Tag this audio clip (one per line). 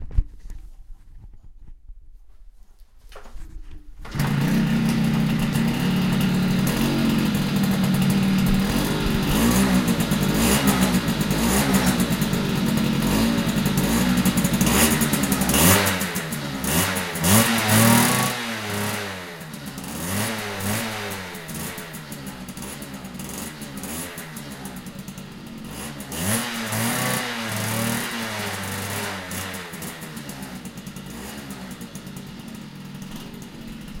kick
idling
start
motorbike